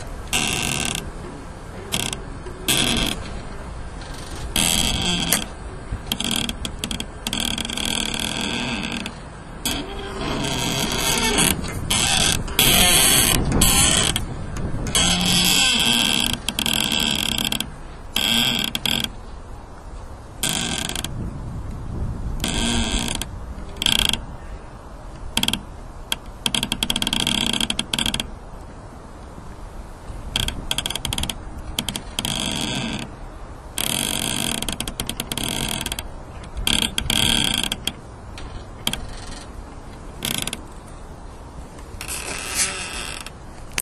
thuja squeaking in wind4
thujas squeaking in the wind and rubbing against a wooden fence not-processed. recorded with a simple Olympus recorder
wind, tree, squeak